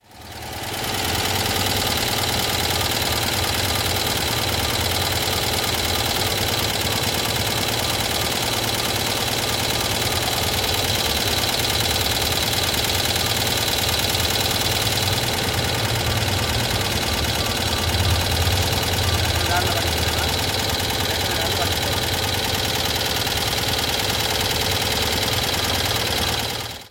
20170401.2CV.idling
Noise of a venerable 2CV engine idling. Recorded with my smartphone in downtown Seville (S Spain)
2CV, automobile, car, citroen, drive, engine, field-recording, idling, motor, vehicle